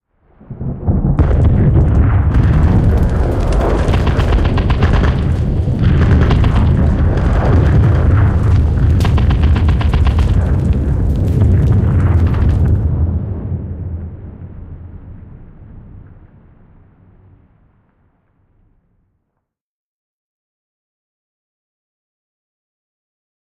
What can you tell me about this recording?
[BG] Combat
A very brief combat zone background clip.
It was made to be played very low in the background, just to support the foreground action of a short transitional scene. So there is not a lot of in-your-face action. Mostly a rolling rumble to represent distant off screen elements, and mid-distant small arms fire.